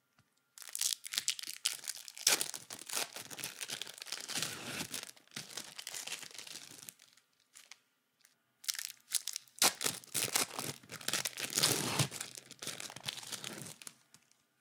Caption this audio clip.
Opening cheese slice packet
Opening an individual slice of cheese
cheese, open, plastic, peel, packet